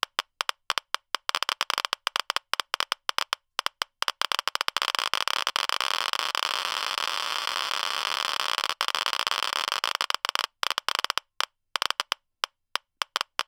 Geiger Counter Hotspot (High)
Sweeping over a highly irradiated hotspot area.
This is a recording of an EBERLINE E-120 Geiger counter, which makes the "classic" Geiger click sound. Recorded with a RØDE NT-1 at about 4 CM (1.6") from the speaker.
Click here to check out the full Geiger sound pack.
FULL GEIGER
DIAL
MIC TO SPEAKER
click, clicks, counter, geiger, geiger-counter, hotspot, radiation, sweeping